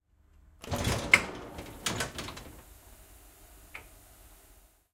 Tilt Train Door Open 2B
Recording of a pneumatic door opening on a tilt train.
Recorded using the Zoom H6 XY module.
machine, opening